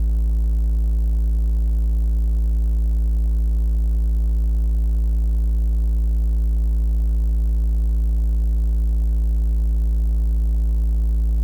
Sample of the Doepfer A-110-1 triangle output.
Captured using a RME Babyface and Cubase.